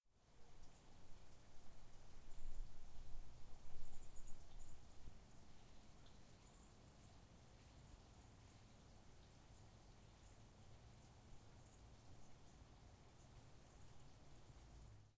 Small babbling brook
Recorded in stereo with a Zoom H6. This is the sound of a small brook or creek that is running through a wetland located in a suburban residential area in Oregon.
AudioDramaHub, water, water-flow, babbling, bubbling, field-recording, creek, audiodrama, brook